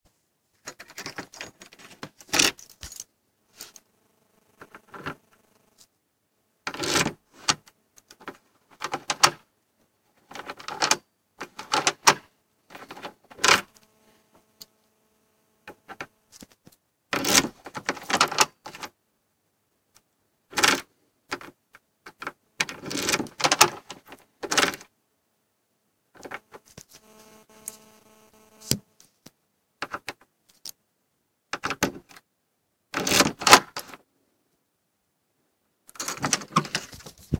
Fiddling with a door lock, with multiple attempts which some fails.